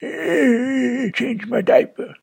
Oldman Change My Diaper
Me groaning like a old man and saying "Change my diapers"